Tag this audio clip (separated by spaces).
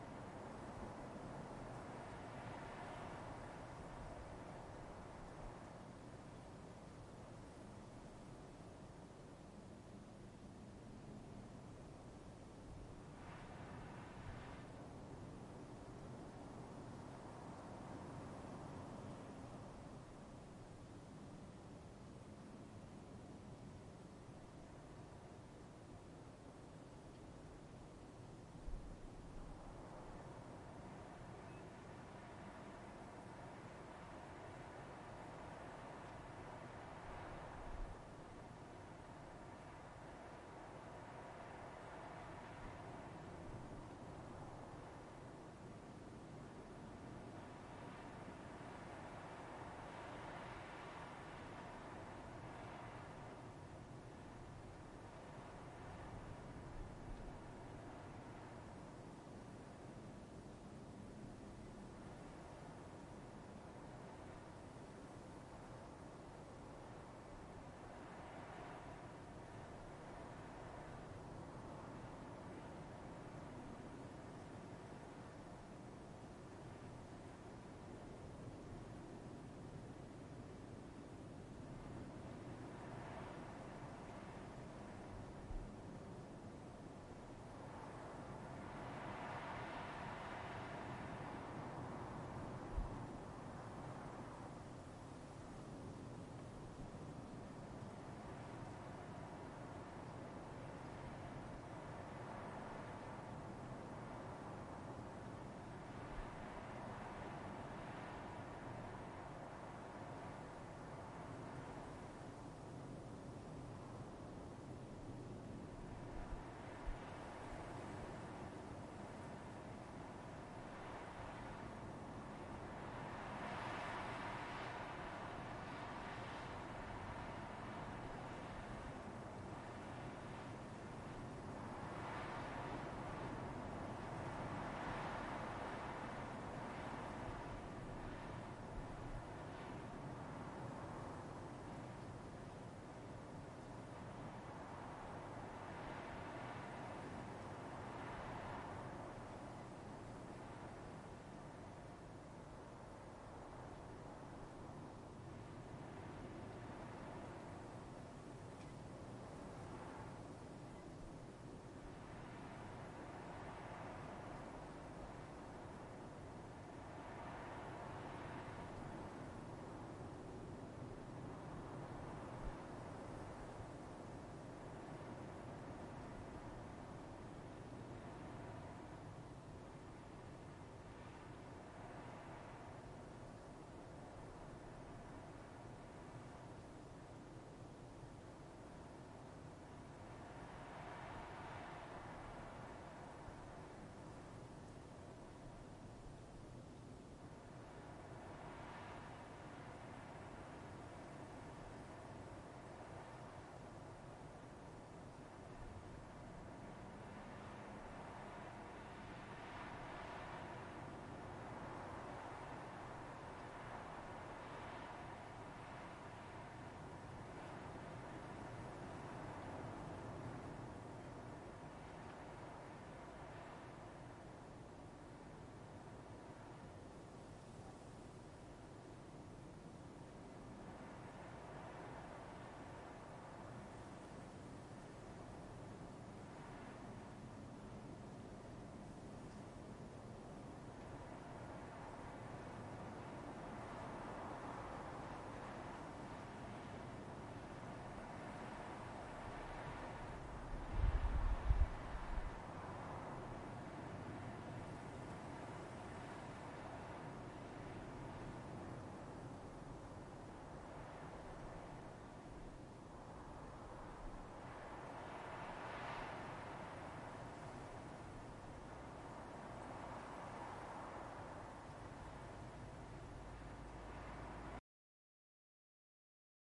ambient wind nature